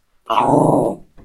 Growling Dog 1
Jack Russell Dog trying to bite something.